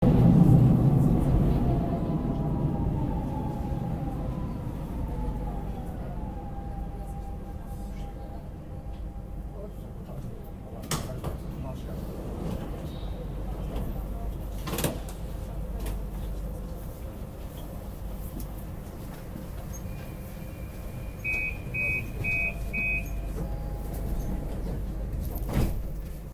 The sound of doors opening and closing on subway.